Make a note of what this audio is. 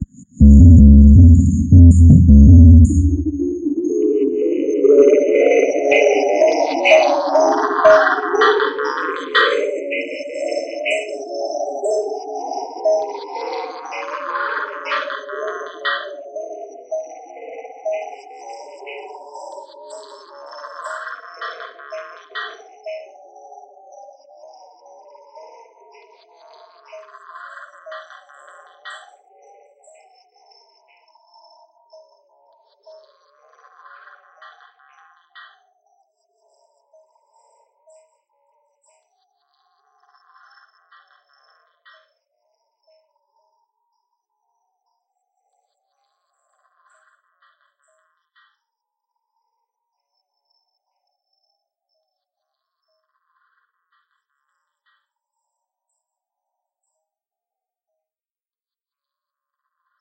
DISTOPIA LOOPZ 003 80 BPM
DISTOPIA LOOPZ PACK 01 is a loop pack. the tempo can be found in the name of the sample (80, 100 or 120) . Each sample was created using the microtonic VST drum synth with added effects: an amp simulator (included with Cubase 5) and Spectral Delay (from Native Instruments). Each loop has a long spectral delay tail and has quite some distortion. The length is an exact amount of measures, so the loops can be split in a simple way, e.g. by dividing them in 2 or 4 equal parts.